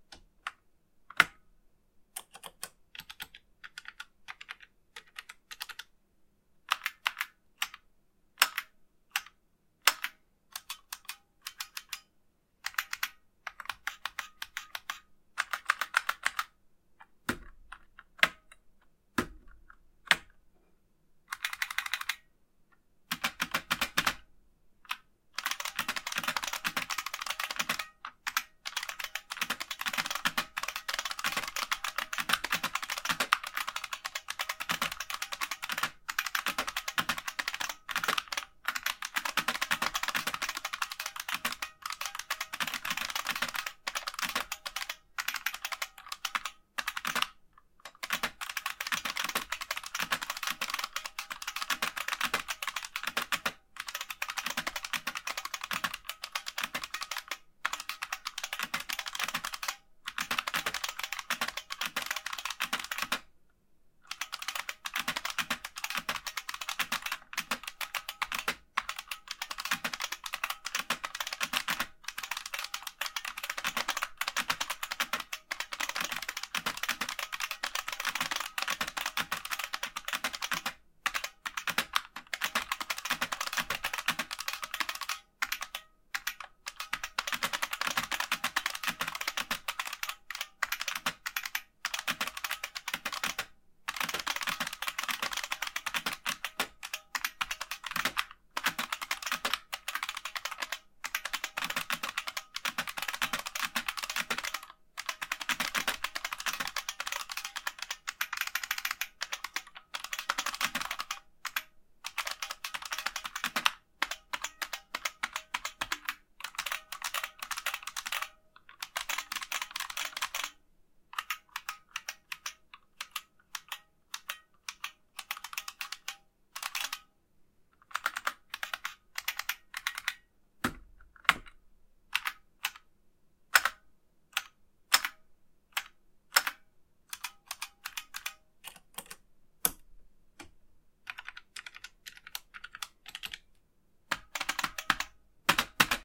Typewriter typing test (typewriter turned off)
Typing sounds from my IBM Electric Typewriter Model 85 (the model 65 and 95 use the same keyboard module and will sound the same) with the typewriter turned off. I flip both power switches as well as push several non-keyboard buttons before I begin typing. This typewriter uses capacative buckling switches (model F) but with a keyboard assembly more similar to the membrane buckling spring keyboard (model M.)
keyboard, keystroke, mechanical, typewriter, typing, vintage